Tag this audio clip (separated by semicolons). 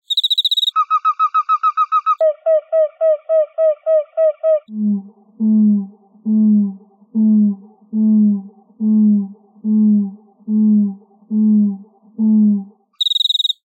audio-experiments; chirp; cricket-experiments; experiment; experimental; field-recording; insect